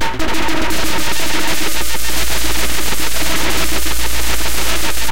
Some Djembe samples distorted